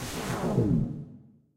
Noise Shutdown
A shutdown sound effect I made for a game that includes noise in it. Can be used for computers, radios, comms, etc. shutting down.
computer, digital, effect, electronic, game, machine, noise, sci-fi, shutdown, sound-design, sounddesign, soundeffect, synth, weird